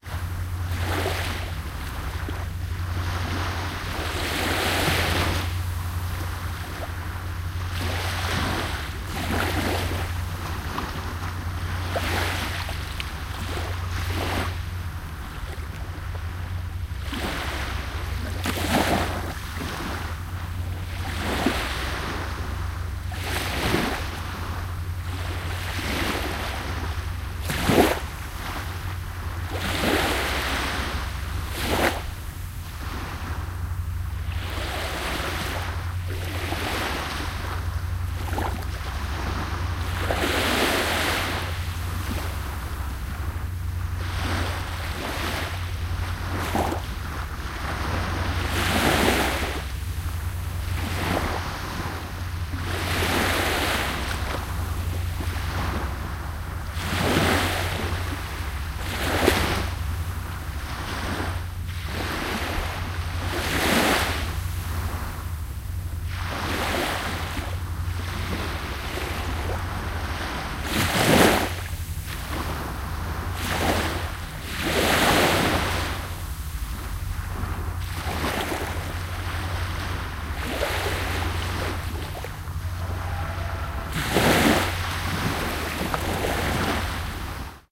Binaural recording of waves on Spanish Banks beach in Vancouver, B.C.